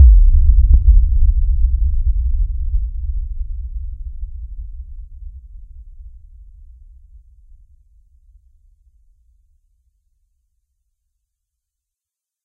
Cinematic Bass Boom
This is a sound I synthesized in Audacity, the details of what I did evade me for it was some time ago, but it sounds like the kind of sound you would use right when you cut into a new scene, probably something that is either breath taking such as an awesome aerial shot over looking a massive forest, or a deeply dramatic and devastating shot, such as one where the bodies of a million soldiers slain by the fearsome enemy are revealed.
This sound is part of the filmmakers archive by Dane S Casperson
A rich collection of sound FX and Music for filmmakers by a filmmaker
Details of Audio
HTZ: 44.1
Source: Synthesized
Also the signal is a bit hot, tends to crack a bit right when it starts on some speaker systems, so watch the levels on this one when you mix it into your stuff
boom, cinematic, Cinematic-Bass, Dark, deep, Deep-Bass, film, Mood, movie, trailer